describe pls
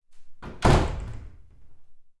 A door with windows in it being closed
door, glass, slam, wooden